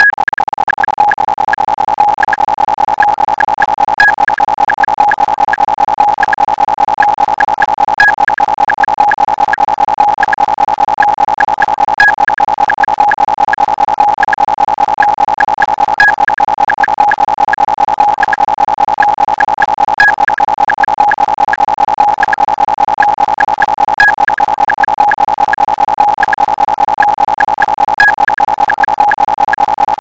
This sound is the result of overlaying a lot of fast click-tracks, in order to create a "wall of sound". I wanted it to sound like an old-timey science-fiction background, in which you'd hear computers churning out random things, made to sound and look as cold as possible.
12 very fast click-tracks (300bpm, 20 beats per mesure, each click is 10 milliseconds, and the sound used is a "ping") + 1 slow click-track (60bpm so 1 beat per second, 4 beats per mesure to give it a musical undertone, each click is 100 milliseconds and the sound is still a "ping") were overlayed with random delays: you can identify the pattern, but it doesn't sound regular.
Made using only Audacity.